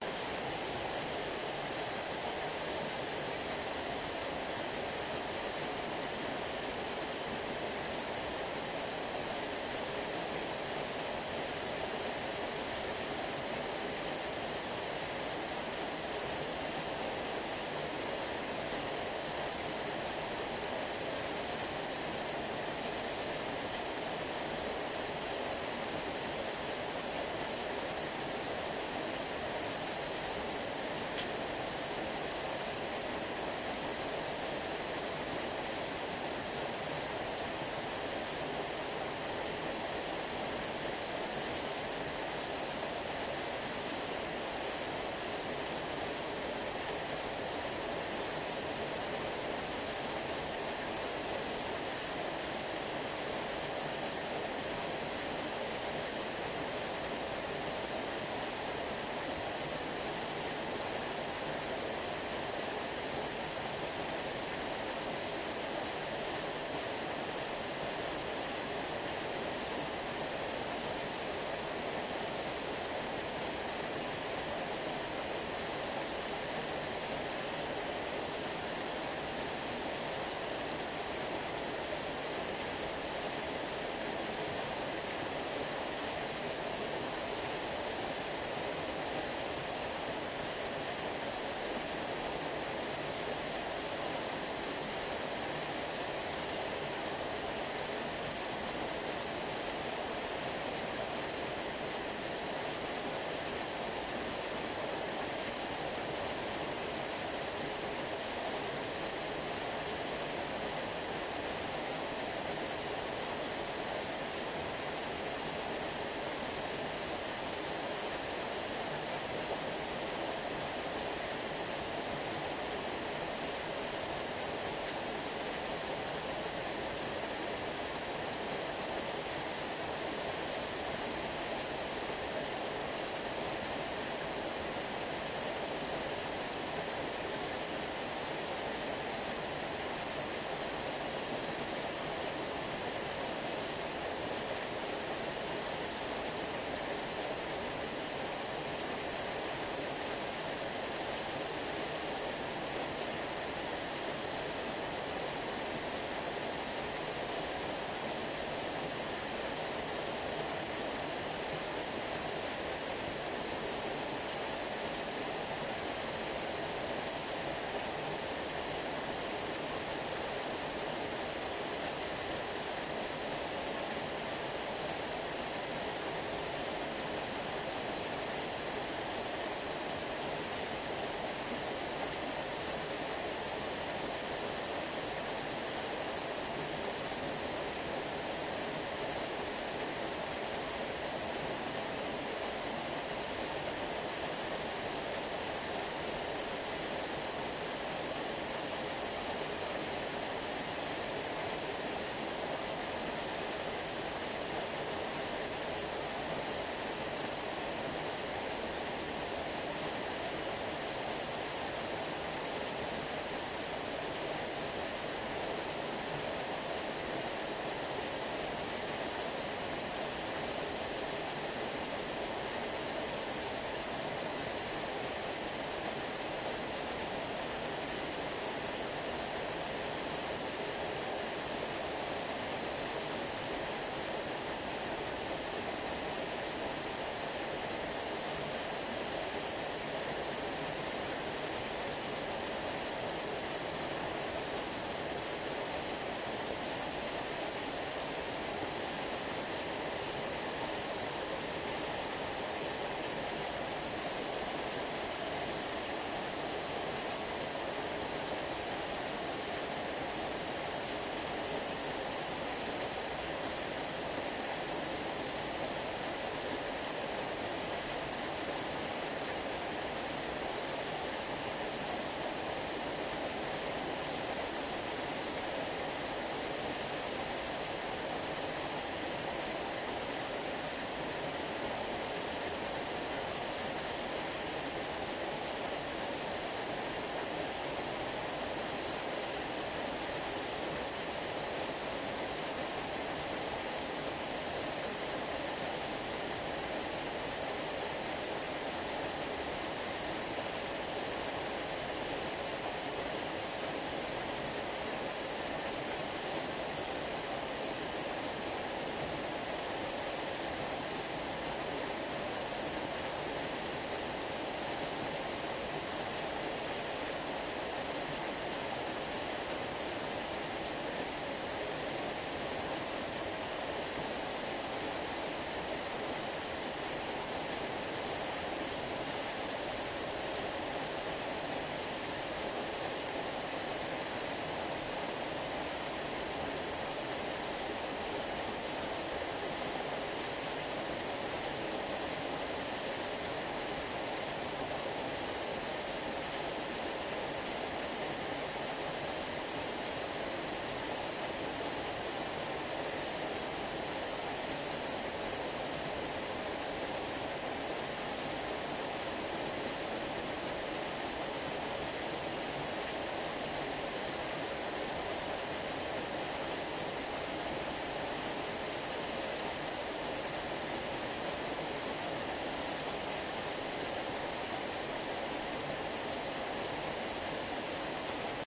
Recording of a waterfall.
water, waterfall, water-sound